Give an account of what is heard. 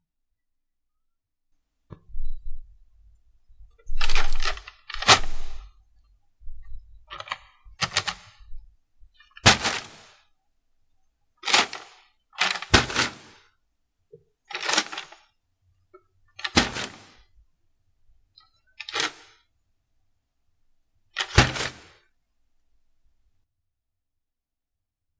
tirando bolsas
bags, home, out, Taking